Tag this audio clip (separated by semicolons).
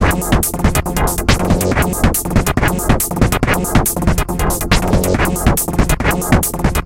8-bit; awesome; chords; digital; drum; drums; game; hit; loop; loops; melody; music; sample; samples; sounds; synth; synthesizer; video